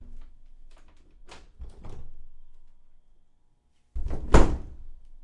Closing Door
Closing-door, Wooden-door